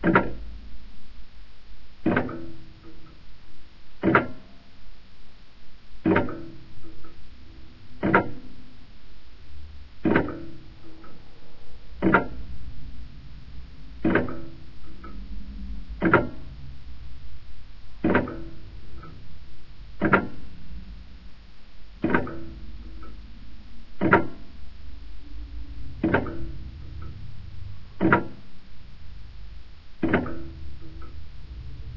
Tabletop clock ticking, 1/8th speed
Prim clock, made in Czechoslovakia in the '70s or '80s maybe.
clock, clockwork, slow, slowed, tick, ticking, tick-tock